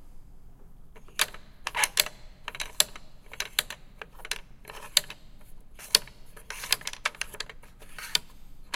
tafelvoetbal cijfers
football-game, home, house-recording, indoor, room